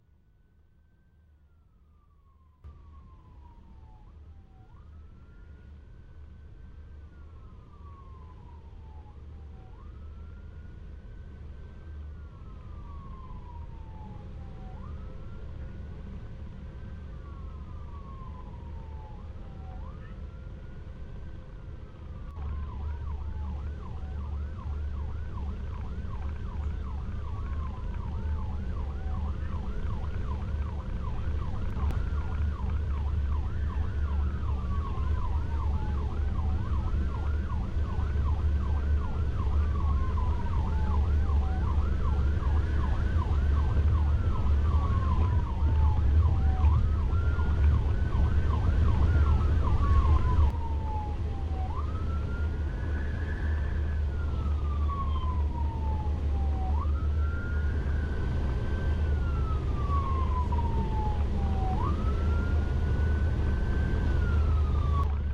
The sound of two units running emergency approaching